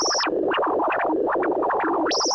Another sound test rendering from vst host running audio synth freeware. Forget which one. Makes the trendy random but monotonous sounds the kids love.